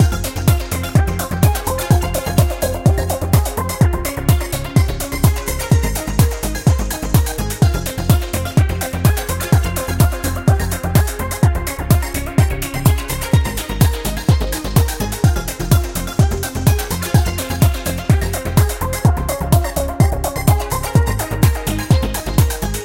A dense and intense stereoloop